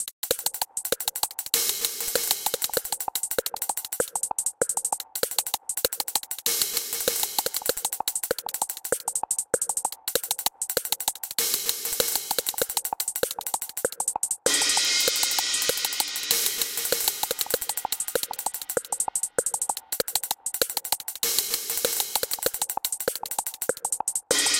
It works with some Amplitude Effects and Modulations and at least the most sounds are layered with Delay and Eq. Created with Music Studio
Bacbeat, Bass, Drum, Glitchy, n